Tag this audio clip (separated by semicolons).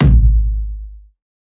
one-shot; kick-drum; bassdrum; oneshot; effected; processed; bottle; kickdrum; kick; designed